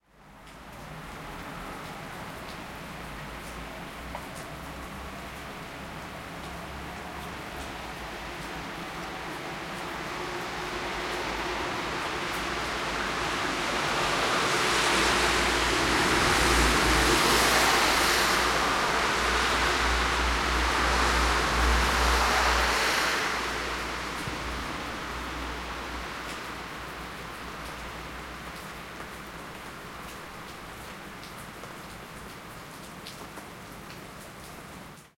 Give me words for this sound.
Cars passing in a rainy street
Cars pass from one side to the other. The street is wet from light rain.
tire, rain, street, cars, car, passes, passing, road, wet, quiet